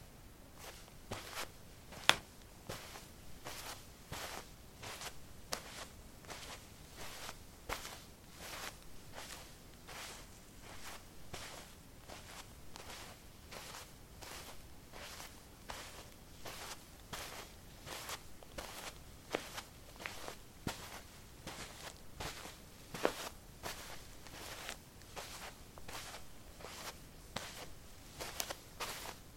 carpet 05a summershoes walk
Walking on carpet: summer shoes. Recorded with a ZOOM H2 in a basement of a house, normalized with Audacity.